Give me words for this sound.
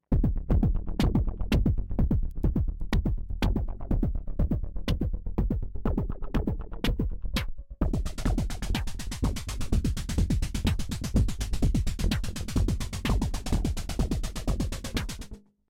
An acidic, broken beat and bassline
beat, broken-beat, drum-machine, drums, synthdrums